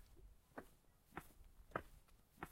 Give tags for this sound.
common; footstep; jump; kodak; walk

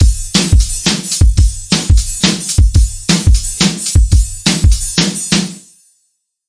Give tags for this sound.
drum; drums; groovy; loop

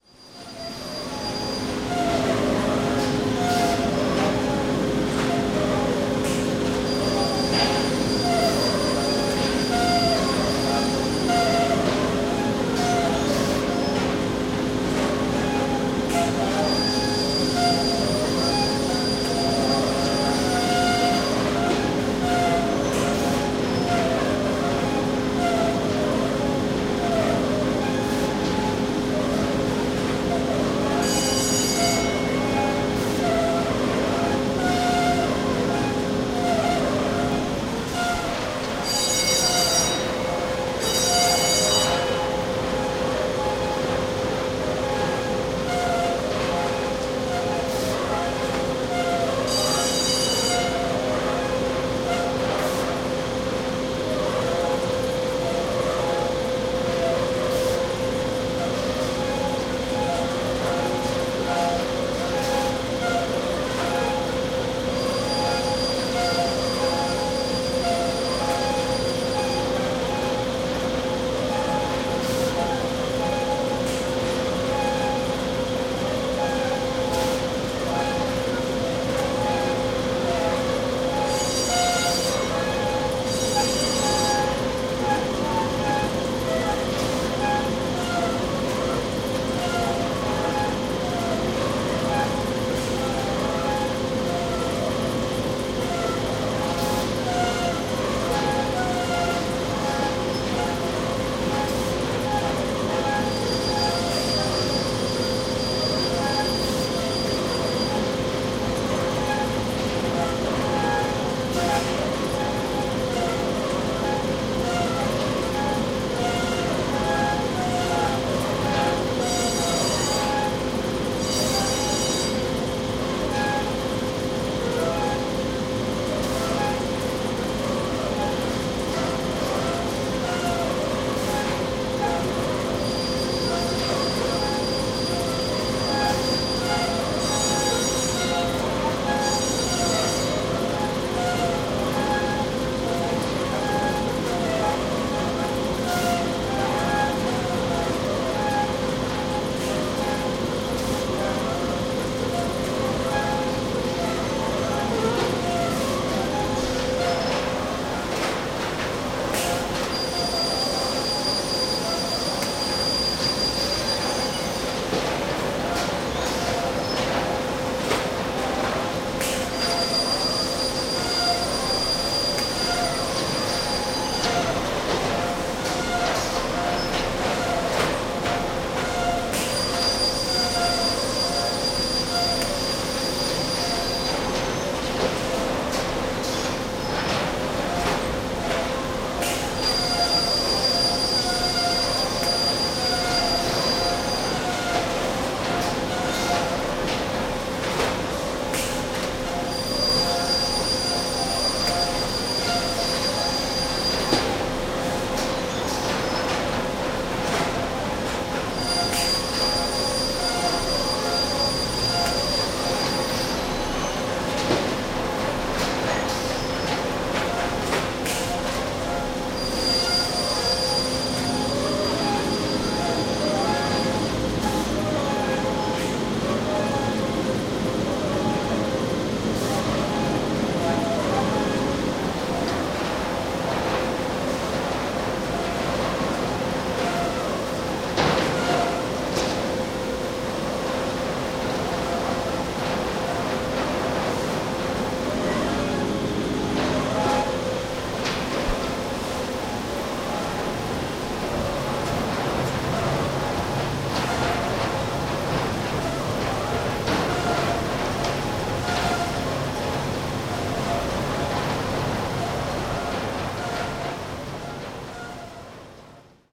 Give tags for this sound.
plant; machinery; farm

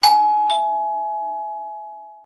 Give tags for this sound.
doorbell; sound

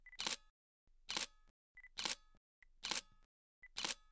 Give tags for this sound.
release; field-recording; dslr; nikon; quick; photography; camera-click; photo; camera; film; shutter; slr

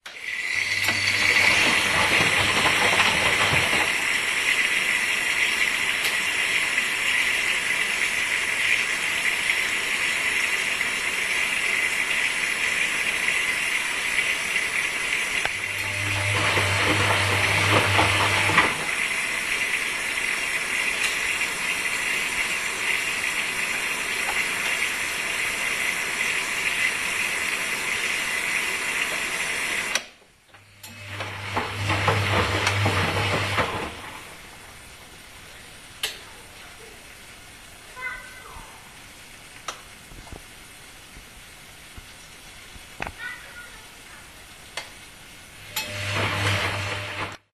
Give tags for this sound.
domestic-sounds; field-recording; flat; home; poland; poznan; tenemnt; wasching; wasching-machine; wilda

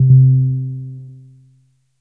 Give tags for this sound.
multisample; electric-piano; reaktor

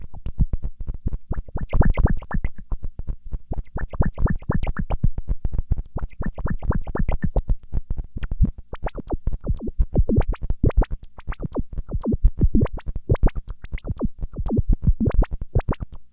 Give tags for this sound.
beep; bubble; bleep; filter; digital; blip; gurgle

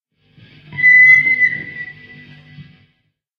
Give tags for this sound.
feedback; guitar

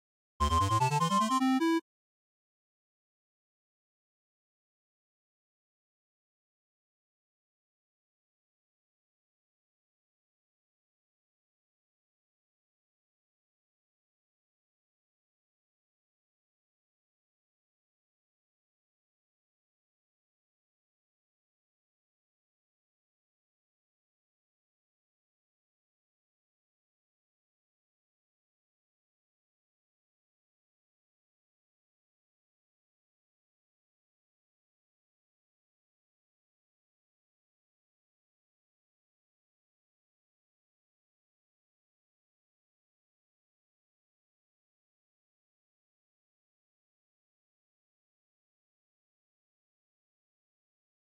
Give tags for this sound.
Chiptune; 8-bit; Field-recording

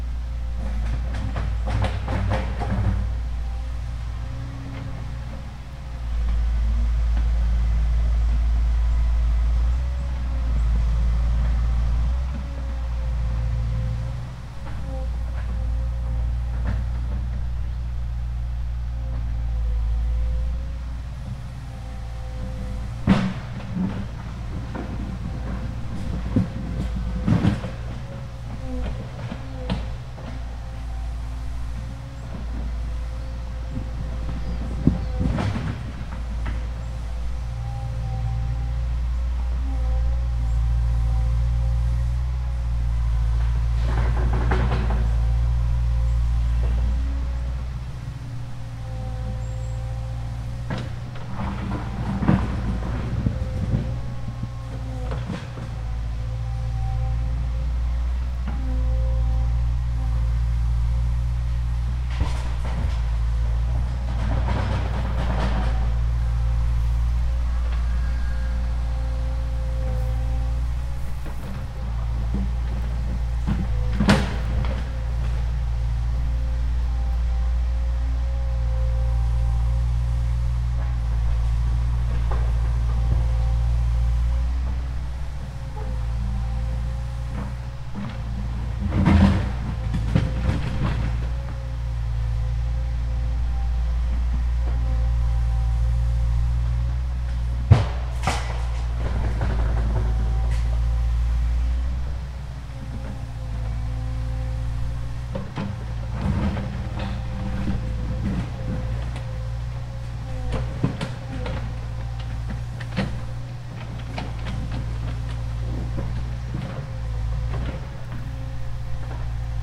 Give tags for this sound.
digging; diggingmachine; excavator; machine

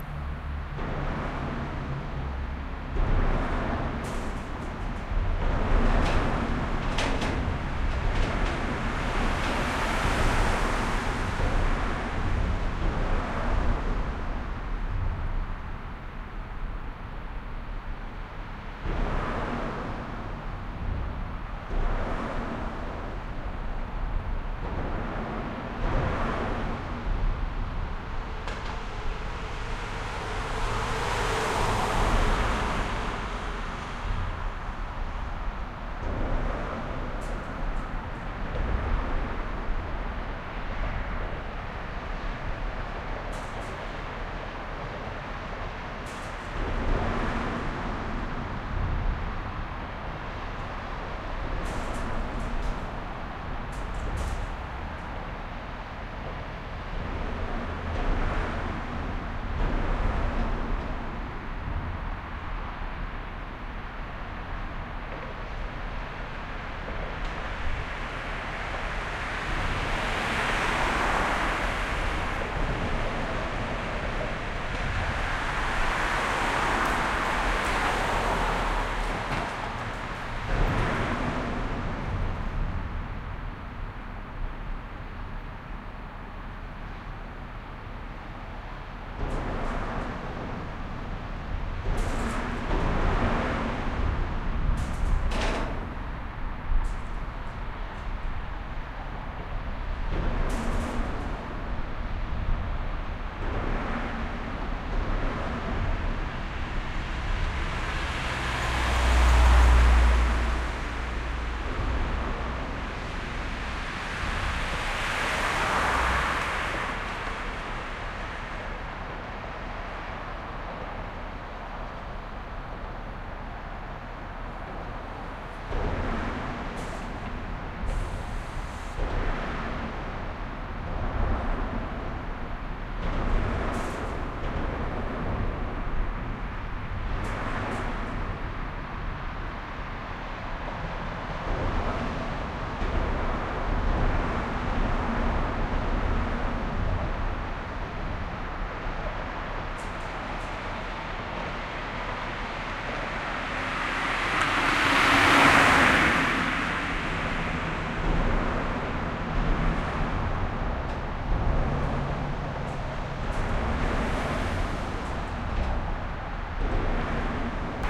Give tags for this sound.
bridge; thumps; traffic; underpass

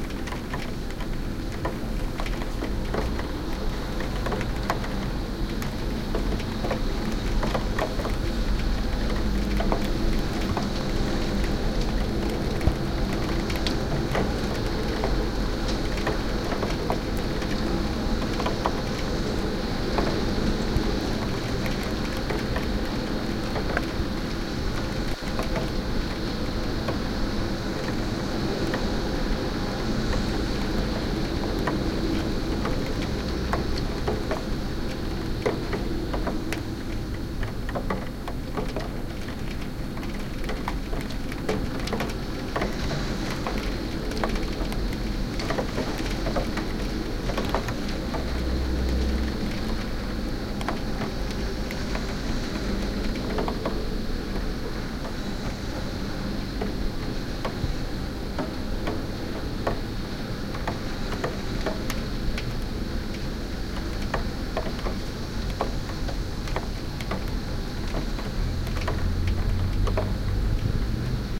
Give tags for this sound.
ambience; rain; window

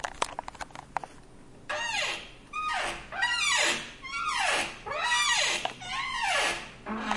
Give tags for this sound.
Sonic; Snap; Sint-Laurens; Belgium; Sint-Kruis-Winkel; Ghent

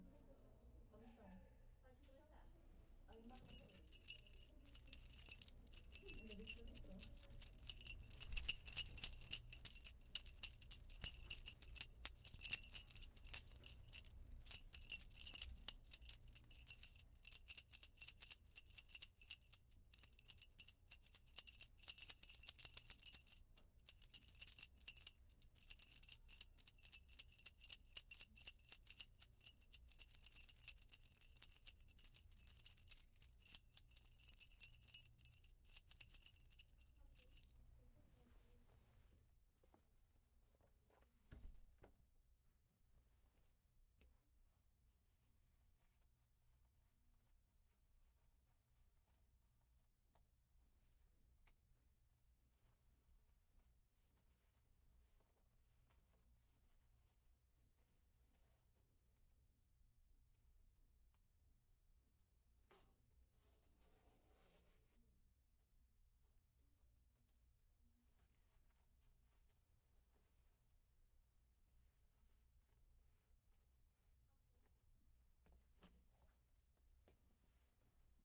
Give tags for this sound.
bling; Key; studio

